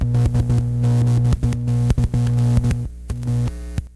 low fuzzy glitch noise from a circuit bent tape recorder